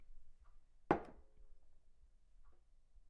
Setting Down Glass On Table
Placing a glass full of water down on a flat hard surface table.
down, flat-surface, full, glass, glasses, hard, hit, placing, setting, solid, table, thud, thuds, water